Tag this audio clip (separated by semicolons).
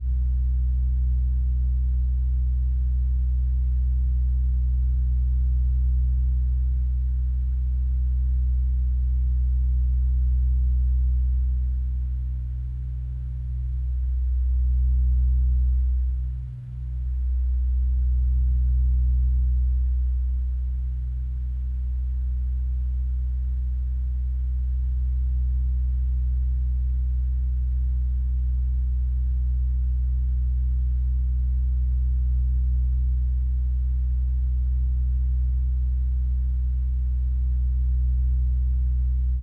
air; blow; blowing; fan; hum; noise; vent; ventilator; wind